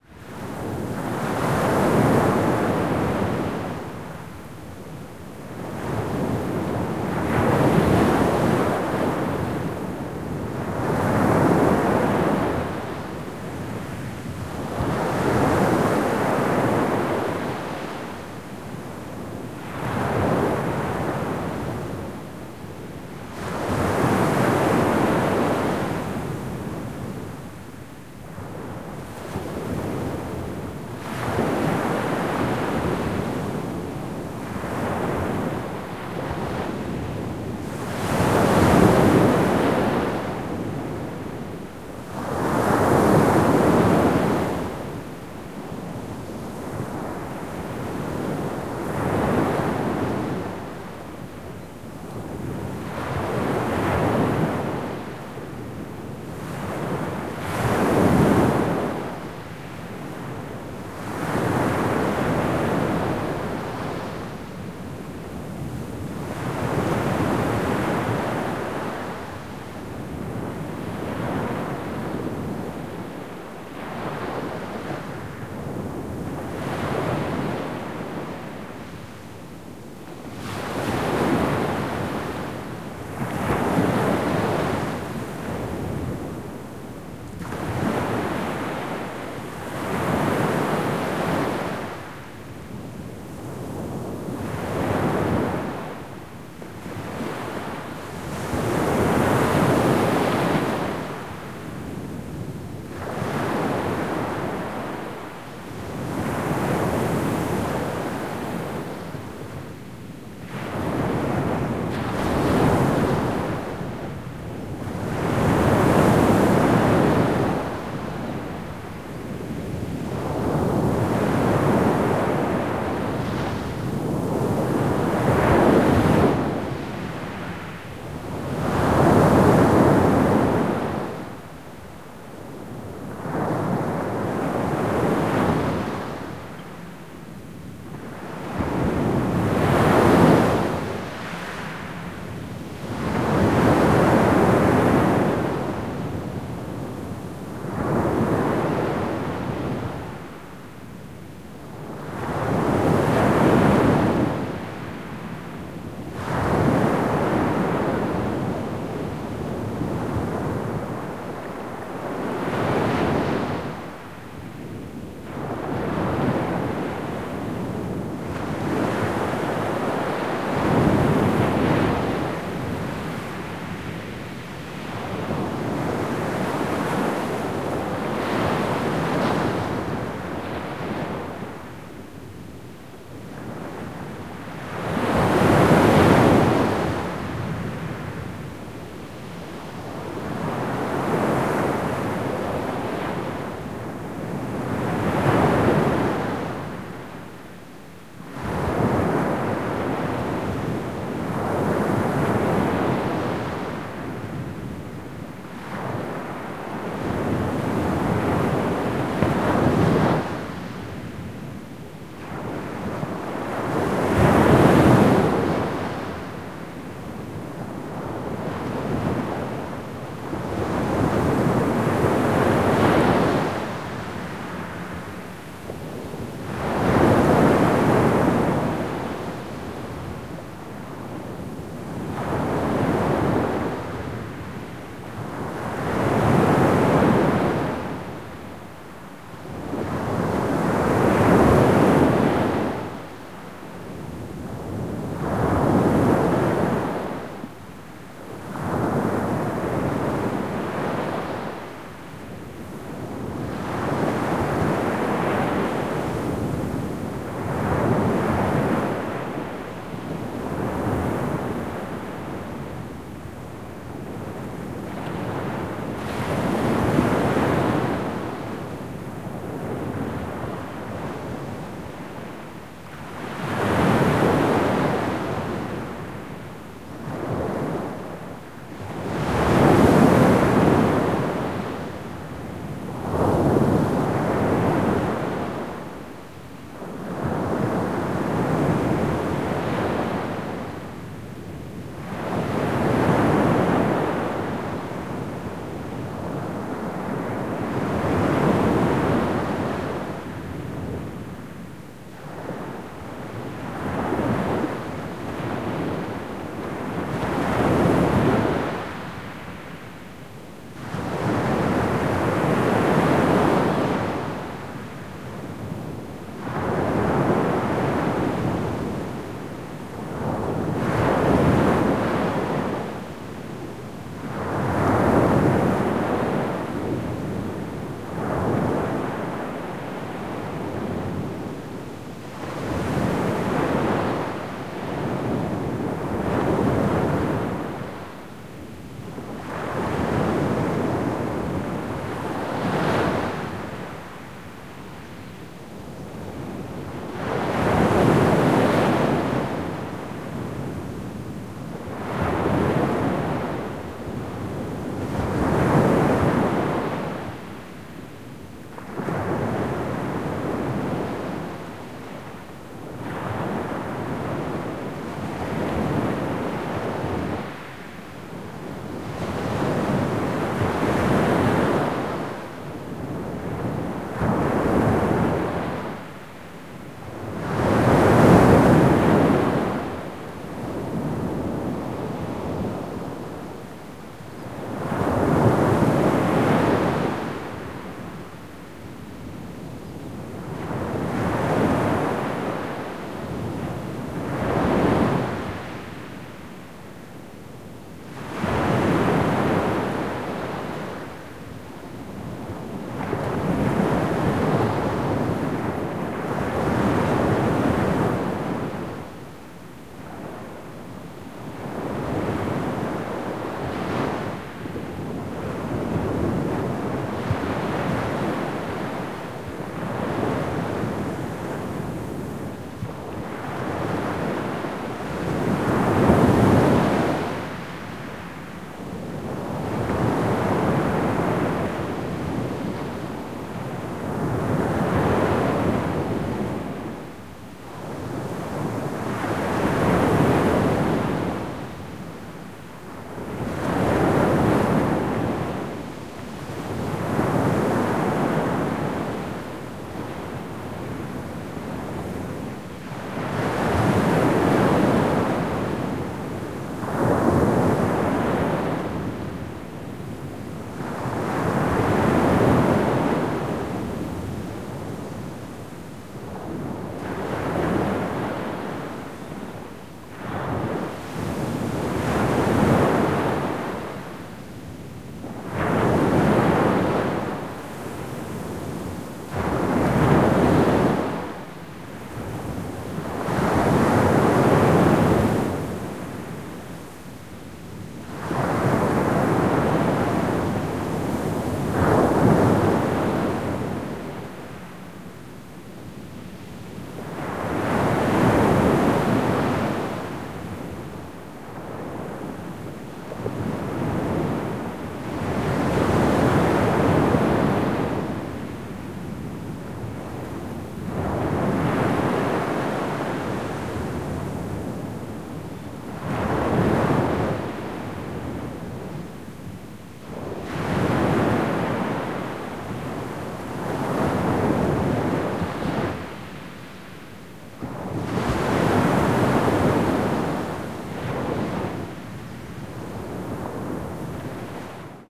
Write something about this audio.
Sea Waves Myrtos Greece
sea, field-recording, waves